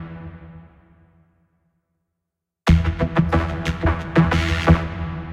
Drum Beat PACK!
beat, drums, nikon, productions